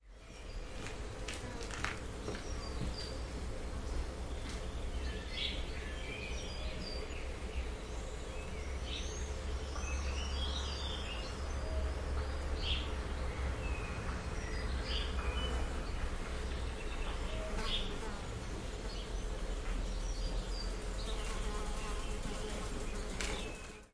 BIRDS & BEES
Son d'oiseaux et d'abeilles. Son enregistré avec un ZOOM H4N Pro et une bonnette Rycote Mini Wind Screen.
Sound of birds and bees. Sound recorded with a ZOOM H4N Pro and a Rycote Mini Wind Screen.
bee, bees, bird, birds, bumble-bee, field-recording, forest, insects, nature, south-of-france, spring